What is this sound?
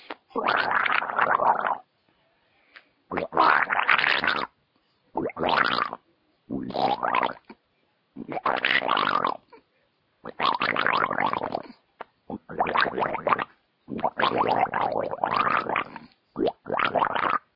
A few samples of me making slime monster noises.
horror, monster, slime